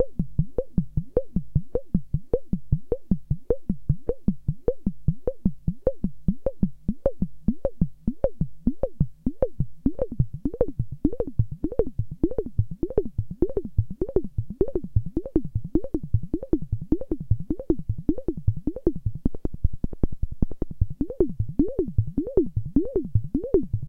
technosaurus rhythm shift 2
While I was playing around with some "extreme settings" on my Technosaurus Micron, this rhythmic structure came out. The bleeps are from the resonance of the 12 pole filter, driven by the LFO. Here, the LFO is driving also the oscillator pitch, resulting in a rhythm shift.
Recorded directly into Audacity through my Macbook internal soundcard.